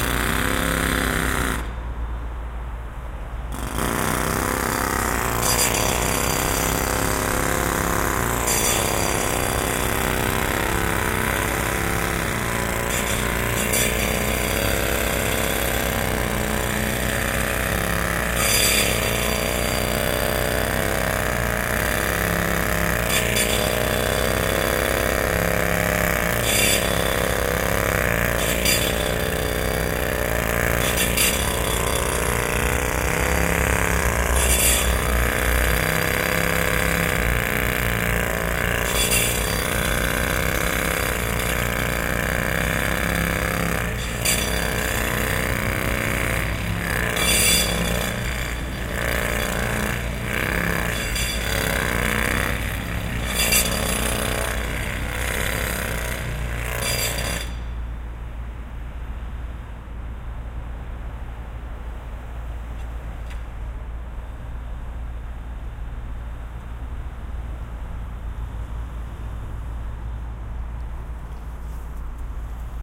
Jackhammer in LA (Binaural)
(RECORDER: ZoomH4nPro 2018)
(MICROPHONES: Binaural Roland CS-10EM In-Ear Monitors)
As these are recorded using binaural in-ear mics, I purposefully don't turn my head to keep the sound clean and coming from the same direction.
This is a man cutting the sidewalk with a Jackhammer. Recorded on 11/16/2018 in Burbank, CA.
Enjoy,
machinery, soundscapes, jack, jack-hammer, concrete, demolition, environment, background, noise, city-soundscape, hammer, sidewalk, los-angeles, construction, city, field-recording, machine, jackhammer, burbank, construction-site, pneumatic